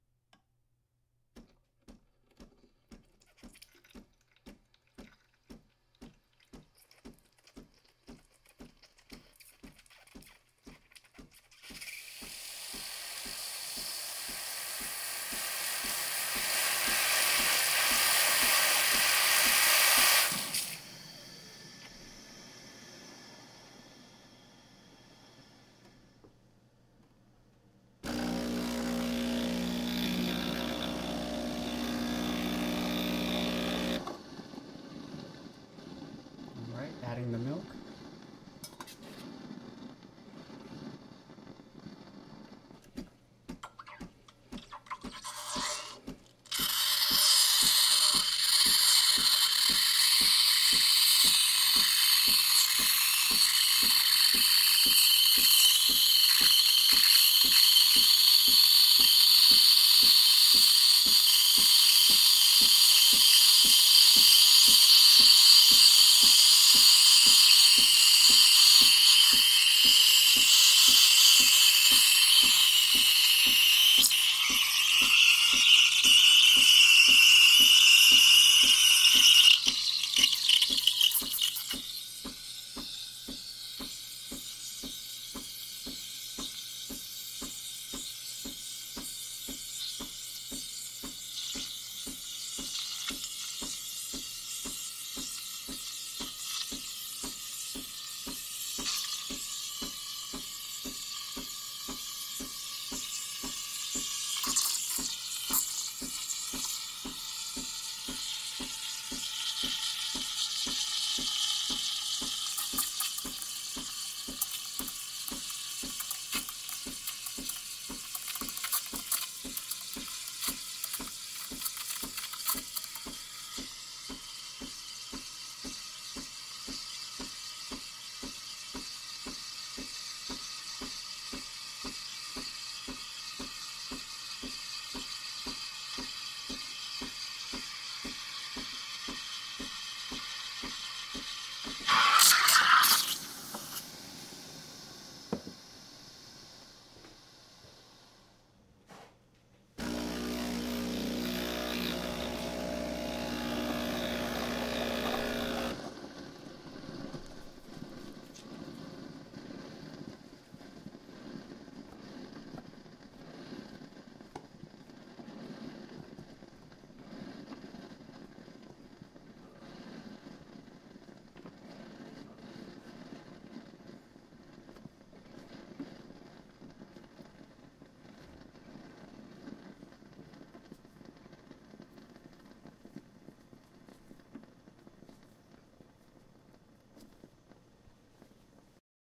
This is a recording of steaming milk on the espresso machine.
This recording has not been altered.
Signal Flow: Synco D2 > Zoom H6 (Zoom H6 providing Phantom Power)